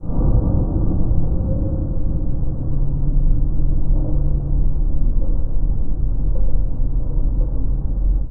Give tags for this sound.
machinery; distant

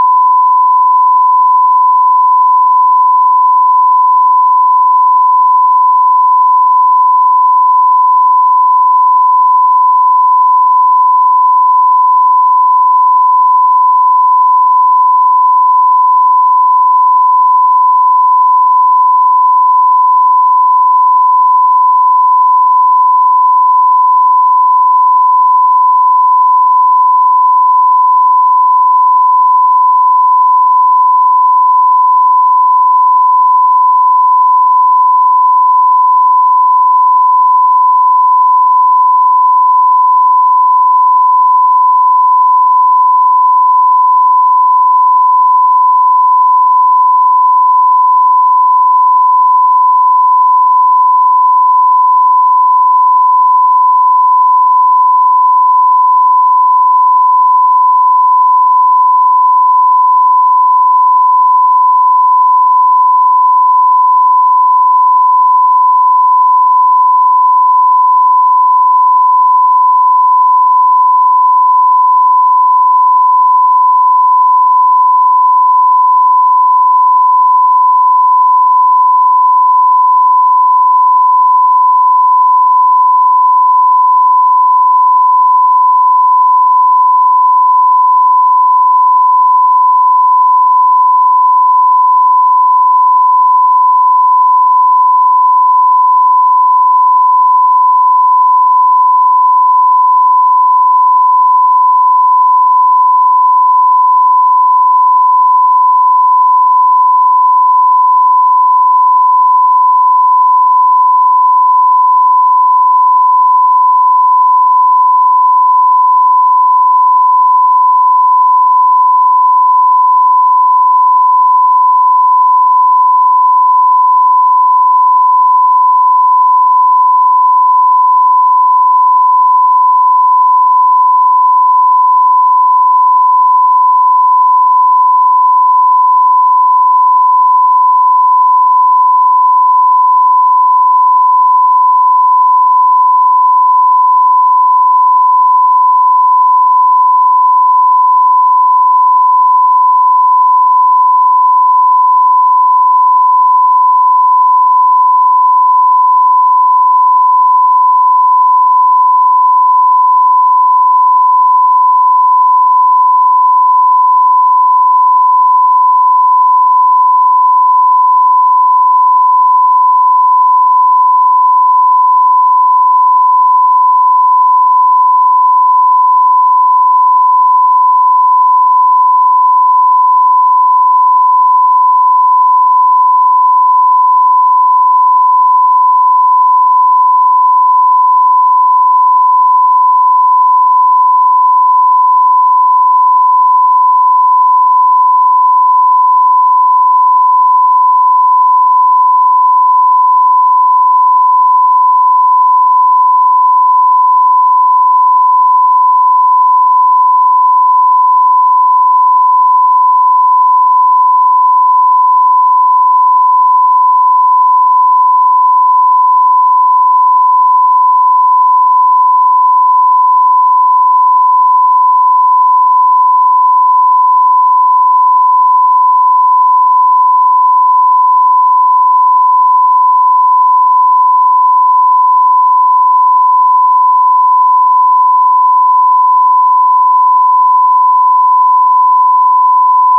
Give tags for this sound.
electric; sound; synthetic

